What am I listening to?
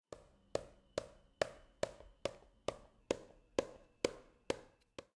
Sound 5-Edited
This sound was also created by hitting a notebook with a small bottle of lotion, at different locations. The sound has been sped up (tempo) in the beginning and then slowed (tempo) down towards the end.
LogicProX, MTC500-M002-s14, notebook, tempo-change